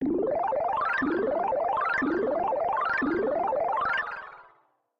Synth loop fade buff power rise magic pitch up
buff, fade, loop, magic, pitch, power, rise, Synth, up